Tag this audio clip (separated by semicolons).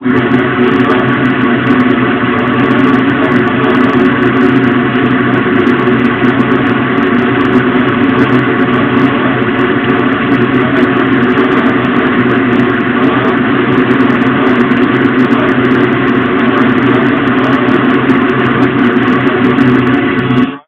cinematic; evil; granular; space; synthesis; synthetic